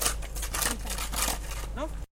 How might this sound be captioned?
SonicSnaps HD Laura&Amy Scraping
This is a sonic snap of a scraping sound recorded by Laura and Amy at Humphry Davy School Penzance
amy, cityrings, humphry-davy, laura, scuffling, shoe, sonicsnap, UK